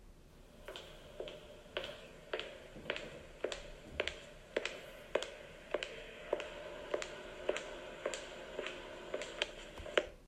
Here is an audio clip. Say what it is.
High heels steps